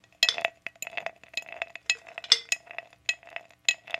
ice sounds 7
ice cubes gently rolled around in a glass
rocks, ice, glass, clink, cubes